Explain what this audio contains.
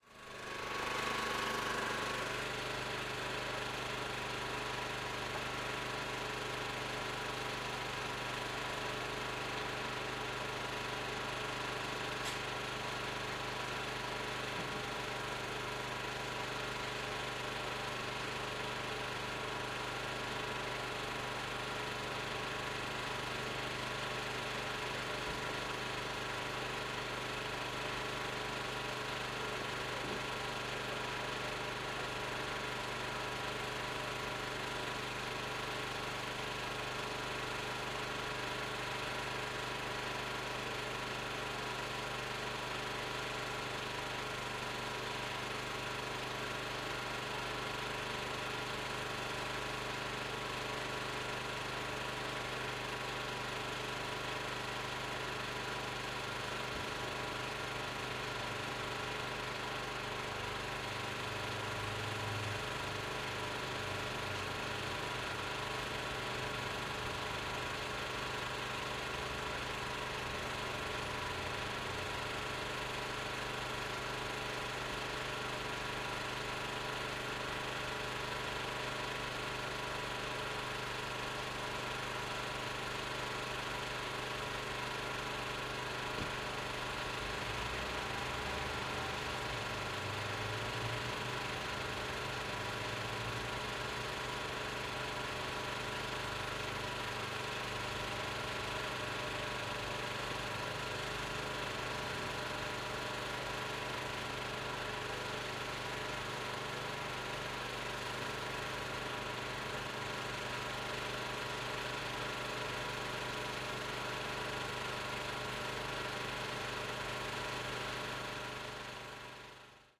cemetery aggregate 011113
01.11.2013: about 22.00. Noise of aggregate warking near of cementery on Piotra Sciegiennego street in Poznan.